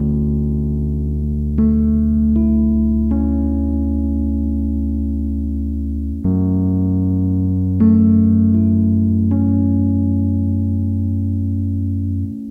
A short couple of notes from a Rhodes Mark II piano. This sound will loop seamlessly for extra ease of use. Sort of sad and lonely style. Recording chain is Rhodes->tube preamp->bass amp->mixer->laptop
ambient, lonely, sad